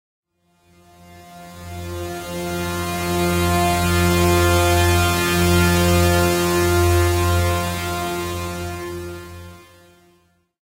Startup Shutdown
Pad sound, saw-like, very good for synths